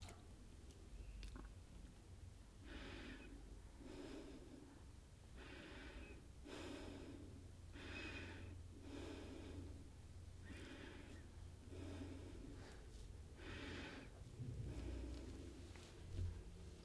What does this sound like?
quiet respiration woman
A woman breathing quietly.